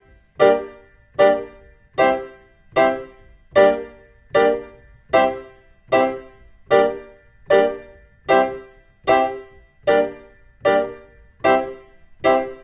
zulu 76 Gm PIANO 1
Roots rasta reggae
rasta, reggae, Roots